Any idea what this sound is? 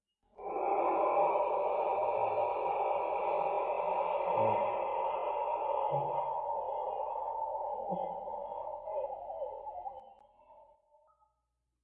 breath of death
A creepy breath or dementor's kiss sound.
use it it far all your soul sucking or ghastly needs
breath, creepy, soul, sucking